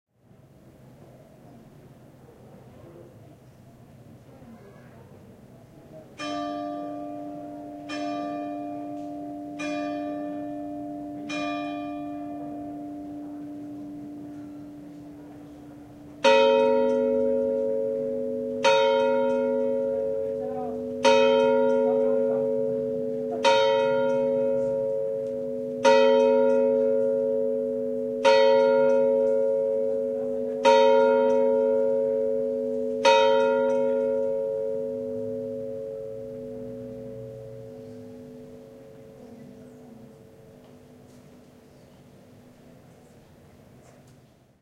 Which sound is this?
bells pselva 1
Church bells from Port de la Selva (village near Cadaqués, Catalonia). Time: 20 hours. Recorded with MD Sony MZ-R30 & ECM-929LT microphone.